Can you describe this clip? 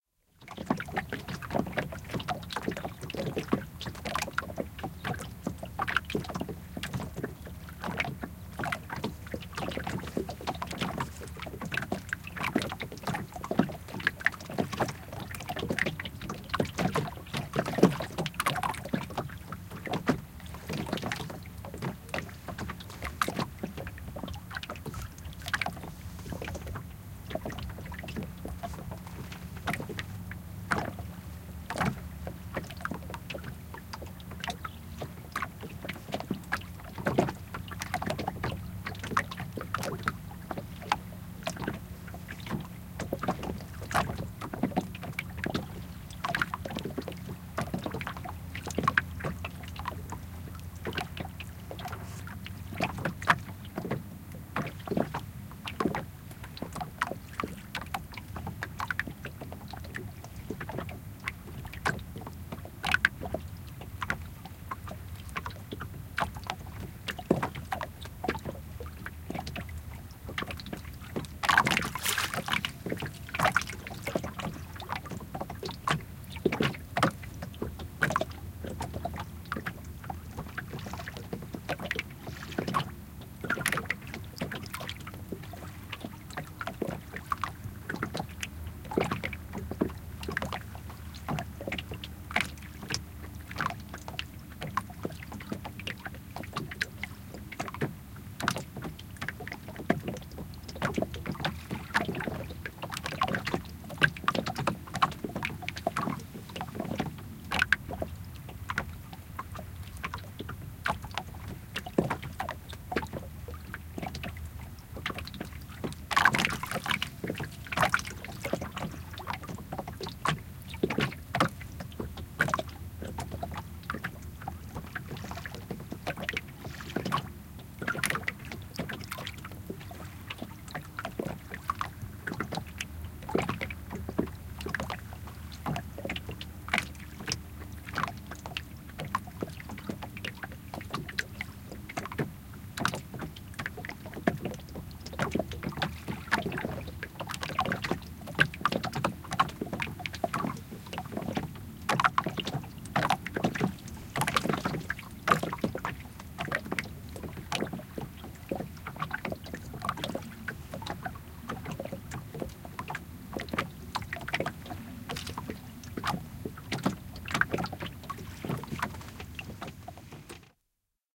Soutuvene, liplatus venettä vasten / Rowing boat, wooden, small waves lapping against the boat, holding still on a lake when fishing, autumn
Puinen vene, puuvene paikoillaan järvellä, kalassa, syksy. Pienet aallot liplattavat venettä vasten.
Paikka/Place: Suomi / Finland / Vihti, Jokikunta
Aika/Date: 01.10.1987